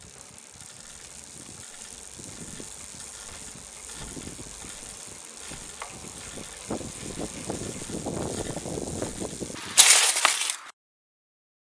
Mountain-Bike Crash Skid